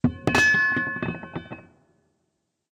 Metal falling
Recorded with h5 and some metal tools i have, falling on solid wood. Then the sound has been slow down.
steel, dropping, falling, ring, metal, resonant, dark, impact, hitting, metalic, slow-down, hit, resonance